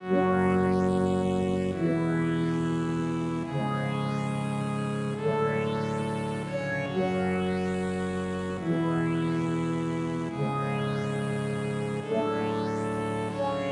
Nice synth tune. If use send me link please with song ;)
Step, Dubstep, Dub, Dance